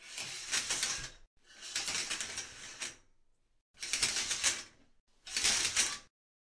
shower curtain (diff speeds)